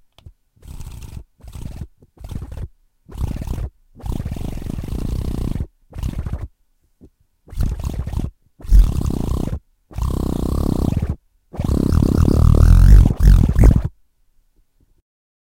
Milk Frother Alien
Weird alien noises maybe?
Alien, Milk-Frother, weird, wtf